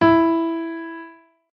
e midi note
note, e